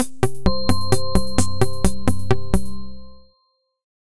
Hmasteraz130bpm FeelGoodTime A
130bpm, ambient, chill, feelgood, happy, loops